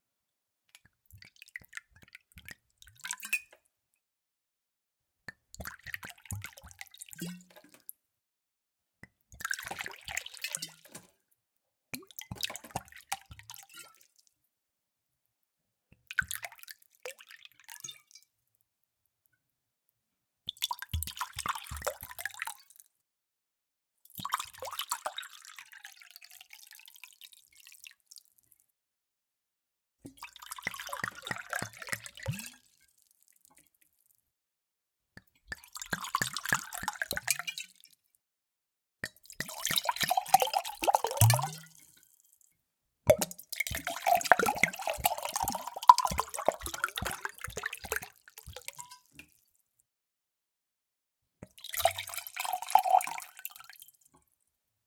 Filling different glasses (small, medium and large) with liquid.